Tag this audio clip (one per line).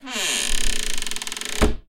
close
closing
creak
creaking
creaky
creepy
door
doors
frightening
ghost
halloween
haloween
horror
open
opening
scary
slow
squeak
squeaking
squeaky
wood
wooden